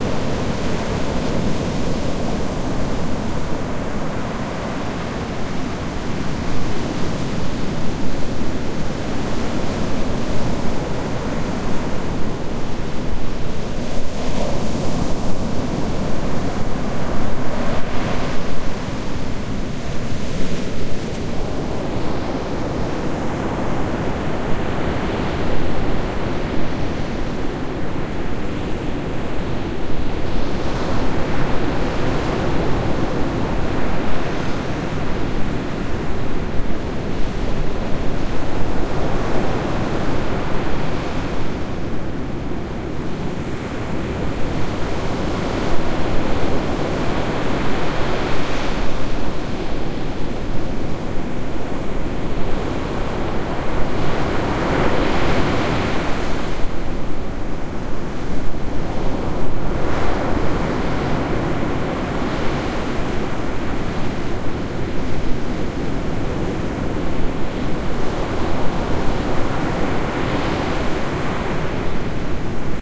wind and sea 02
The noise of the wind and the Baltic Sea.
The record was not edited in soft.
Baltic, sea, weather